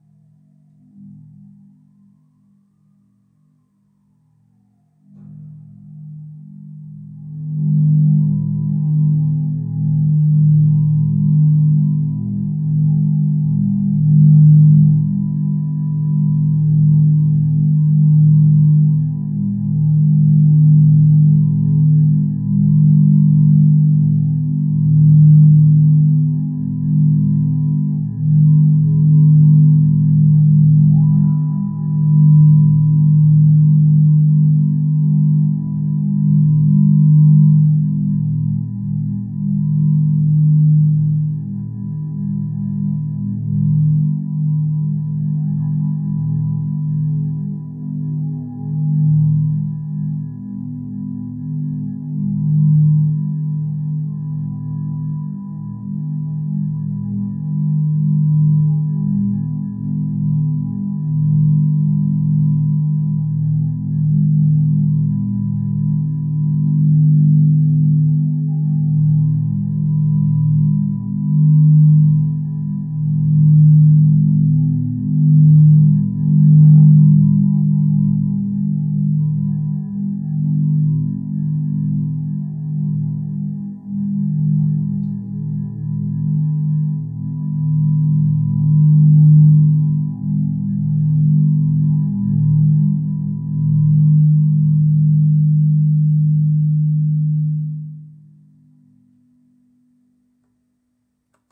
metallic drone
A strange drone created by feedback loops.
ambient, drone, electric, electronic, feedback, future, metal, noise, sci-fi, sound-design, spaceship, strange, weird